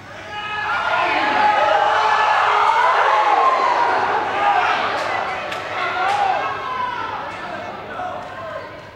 20100711.worldcup.01.oh!
people in my neighbourhood shouting during the final of the 2010 FIFA World Cup, Spain-Netherlands). Sennheiser MKH60 + MKH30 into Shure FP24 preamp, Olympus LS10 recorder
cheers, competition, fans, field-recording, football, game, goal, match, shouting, soccer, spanish, sport, voice, world-cup